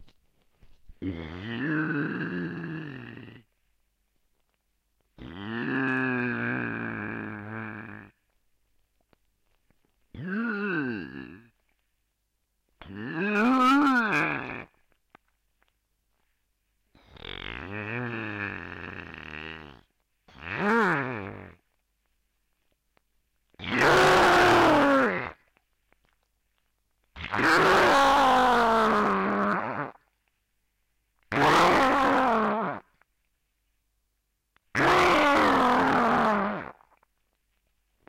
I have three cats, one of which is a small 16 years old lady. The Norwegian Forest cat weighs four times more, and he is not afraid of the small female, with one exception; when she got a bad day and explodes for nothing, you'd better not getting too close!